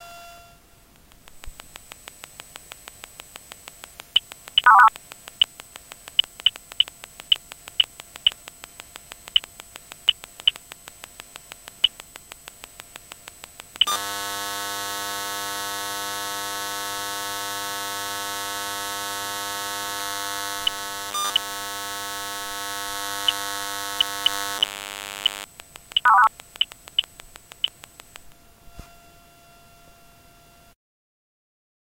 Recordings made with my Zoom H2 and a Maplin Telephone Coil Pick-Up around 2008-2009. Some recorded at home and some at Stansted Airport.
bleep, buzz, coil, electro, field-recording, magnetic, pickup, telephone